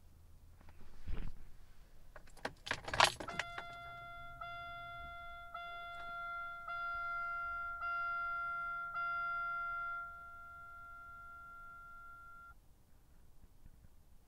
the beeps a car makes when you do not have a seatbelt
beeps, car, warning